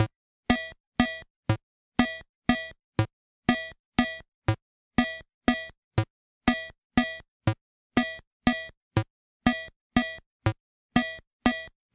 MusiTech MK-3001 rhythm waltz
The electronic waltz rhythm from a MusiTech MK-3001 keyboard. Recorded through a Roland M-120 line-mixer.
beat, electronic, keyboard, loop, MK-3001, MusiTech, rhythm